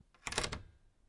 Key insertion
Putting key into lock
Recorded with Zoom h2n